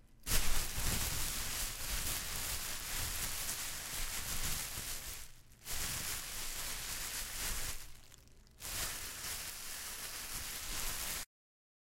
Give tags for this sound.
bag; plastic

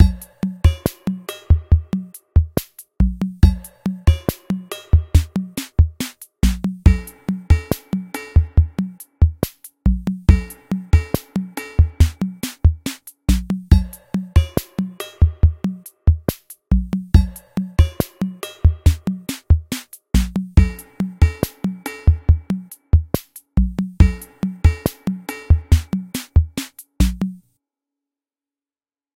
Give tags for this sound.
percs; percussion-loop; drum-loop; beat; drums; 140-bpm

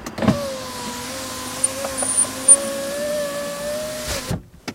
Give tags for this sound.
closes; windows

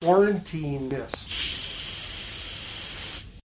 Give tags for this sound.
burst; lysol; spraycan; can; MUS152; spray; quarantine; aerosol; air; aero; disinfectant

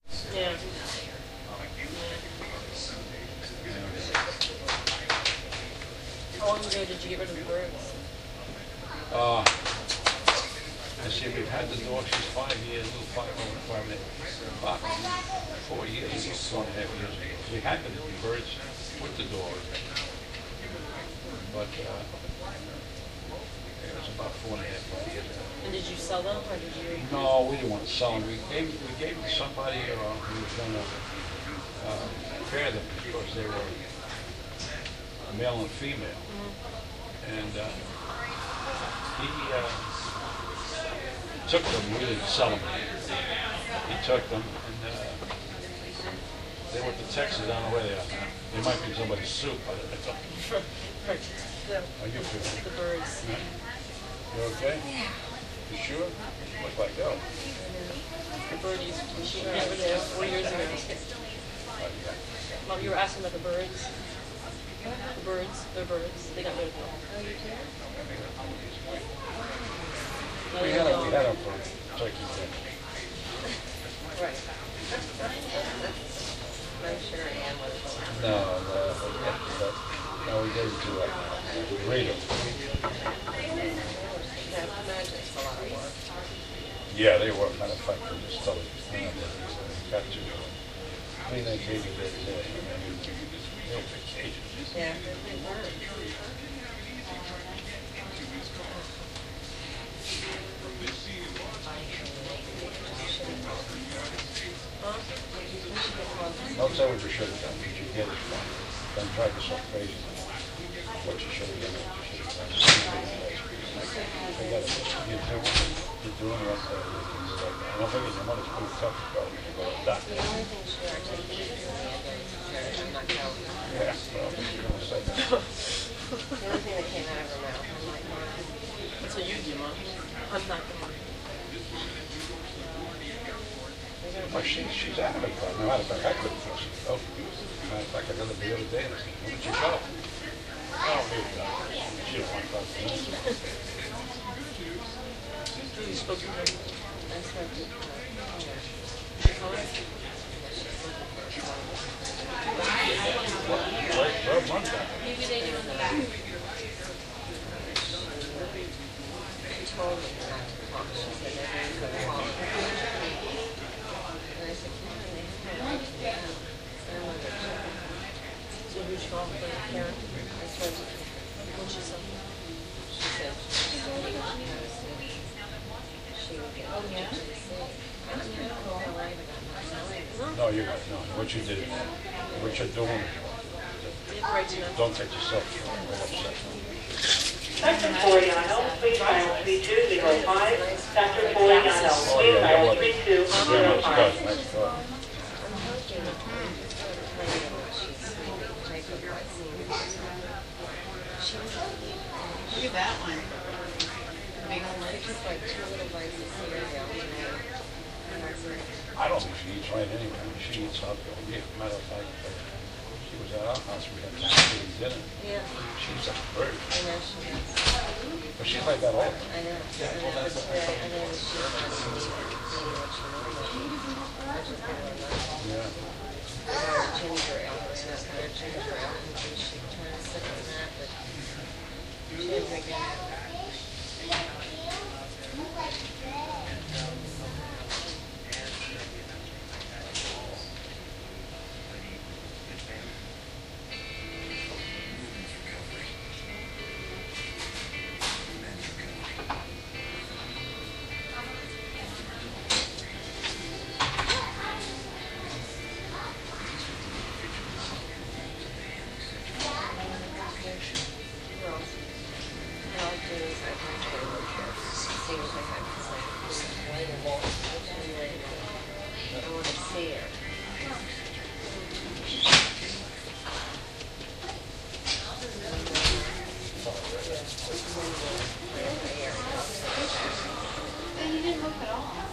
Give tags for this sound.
ambience; emergency; hospital; room

